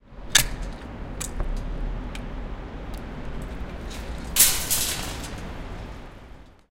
Take shopping cart
Sounds while taking a shopping cart equipped with coin-operated locking mechanisms in a big car park (noisy and reverberant ambience).